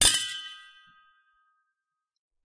Metal water bottle - hit with drumsticks 7
Hitting a metal water bottle with drumsticks.
Recorded with a RØDE NT3.